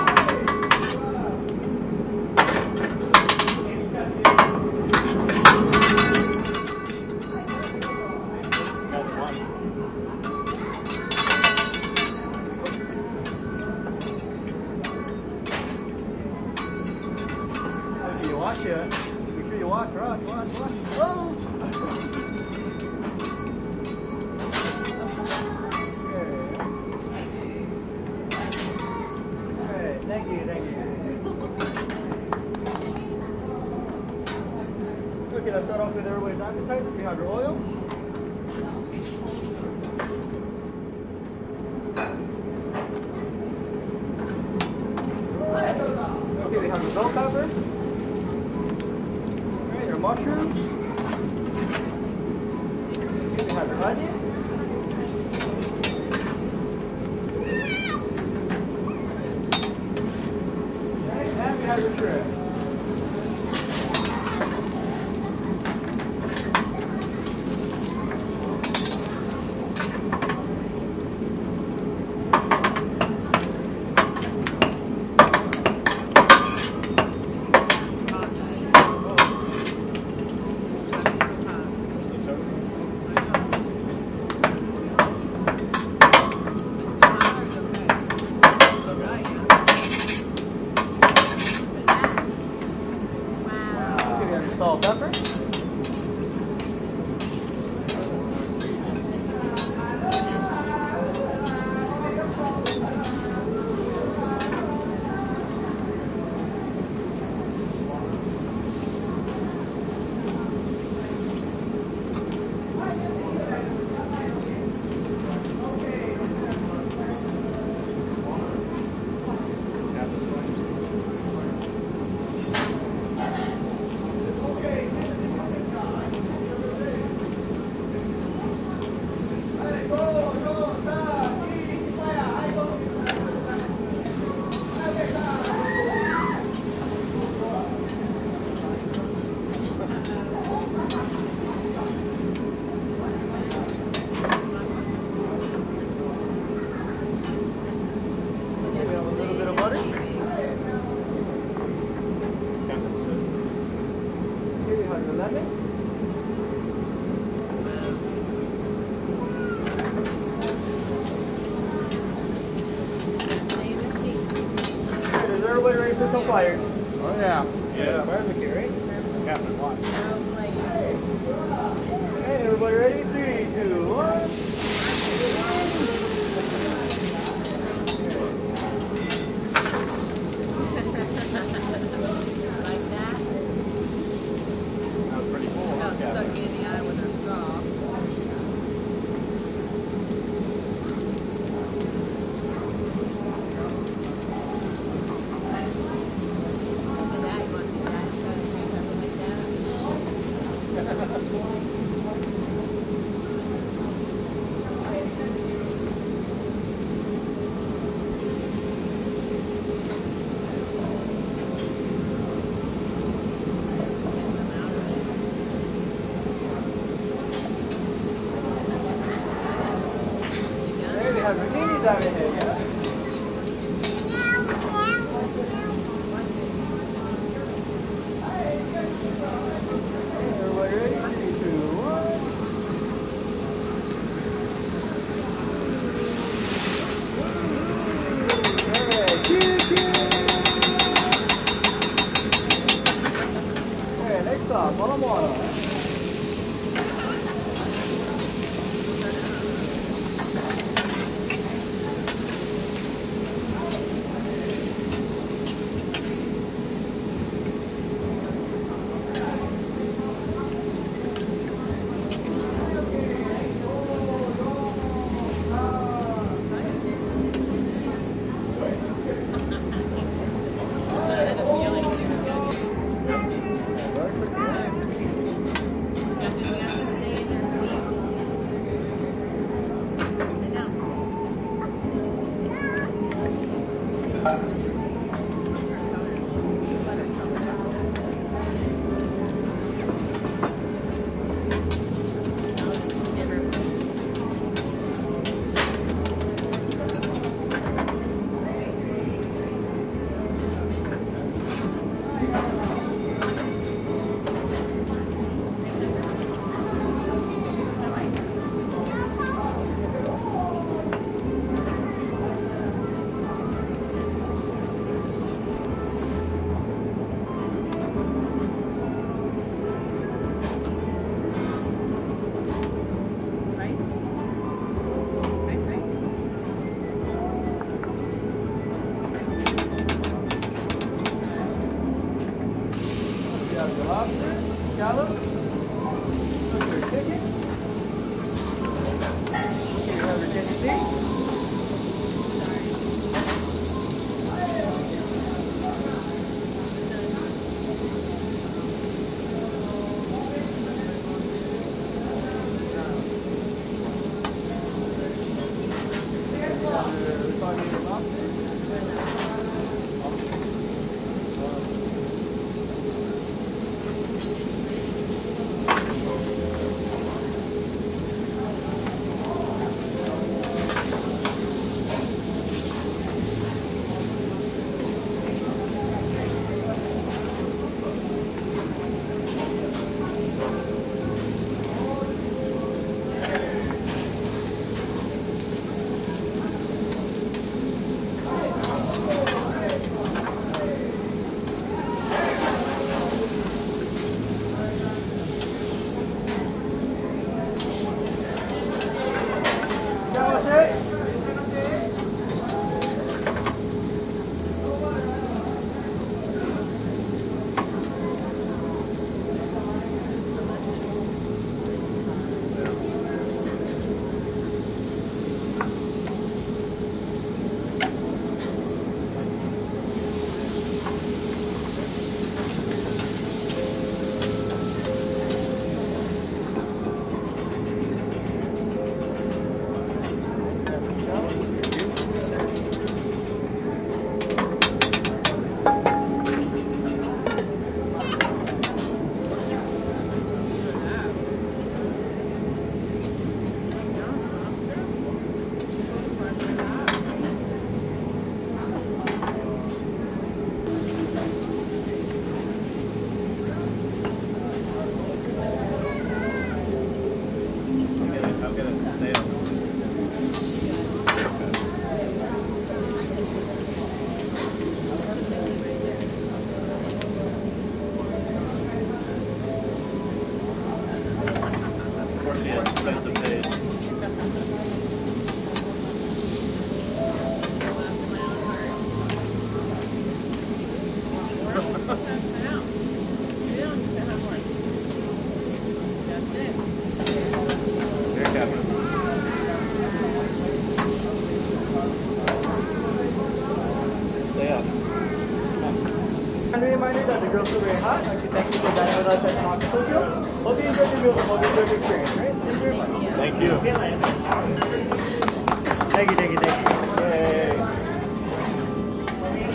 teppanyaki-TanakaofTokyo-Honolulu
Long set of audio with some time based cuts. Includes a complete set of cooking sounds, flames, teppanyaki knife and spatula sounds. Some of the sounds are from the up close chef, others sounds are distant background cooking samples as well. Recorded at the beginning of a dinner service at Tanaka's of Tokyo located in Honolulu Hawaii.
cooking, Japanese, restuarant, Tanaka, teppanyaki, Tokyo